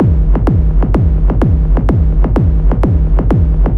Propellerheads Reason
rv7000
3 or 4 channels, one default kick, others with reverb or other fx.